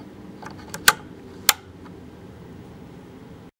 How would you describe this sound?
light on-pull string click
turning on a light with a pull string switch
click, light-switch, pull-string